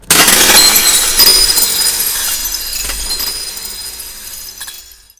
dishes dropped onto hard stone floor
This is a sound described in the "Dr. Discord" scene of "The Phantom Tollbooth" by Norton Juster: "whole set of dishes dropped from the ceiling onto a hard stone floor". I needed it for a play so I created this sound.
Sounds I used to create it:
dishes, discord, phantom, stone, doctor, hard, dr, tollbooth, floor, dropped, ceiling